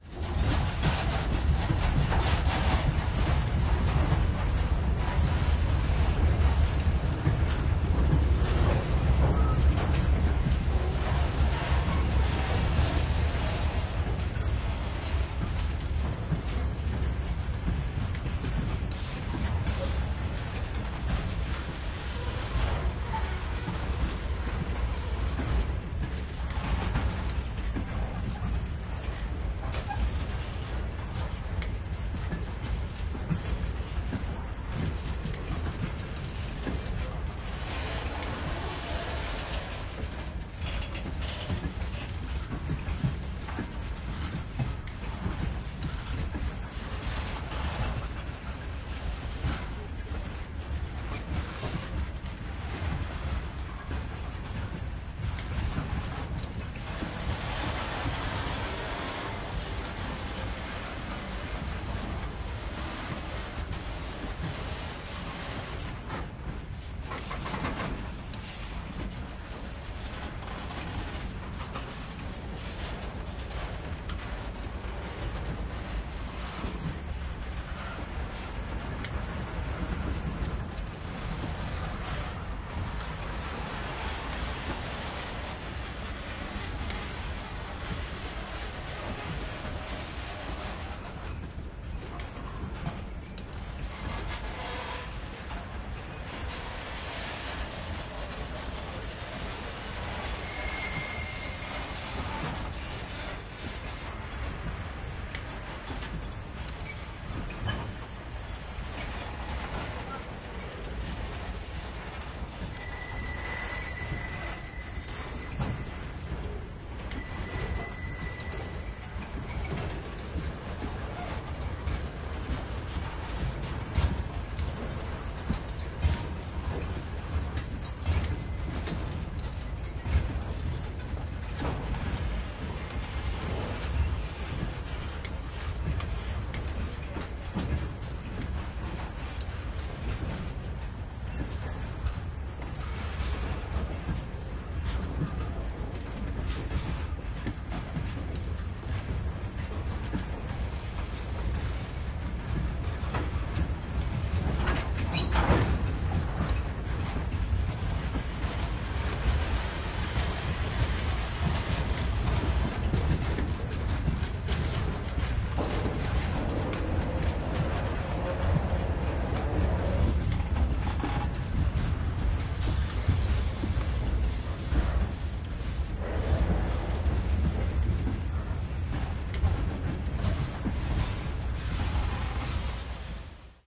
Train slow on cl yard
the train moves slowly on the Classification yard
Classification, railway, train